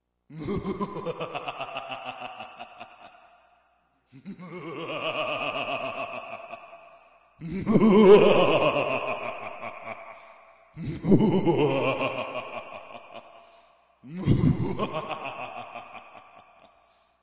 evil-laugh-multi
I recorded my friend's excellent "muahahahaha"-style laugh. This file contains several takes, and has some echo and reverb on it.
laugh, laughing, scary-laugh